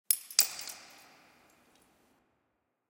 Dropped, crushed egg shells. Processed with a little reverb and delay. Very low levels!
crackle, eggshell, ice, drop, crush, splinter, crunch